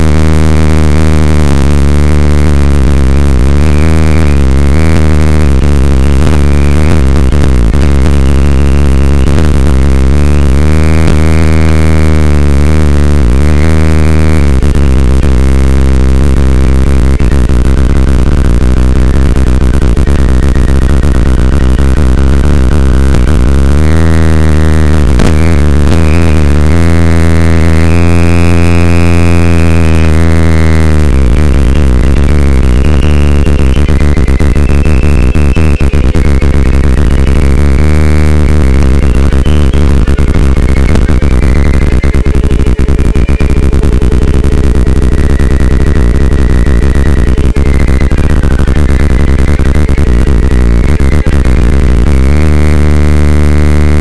Just a bad mic.
noise, broken-microphone